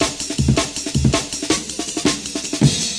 Old skool jungle break.
drums, breakbeat, old-skool, drumnbass, amen, jungle, dnb, drumandbass, break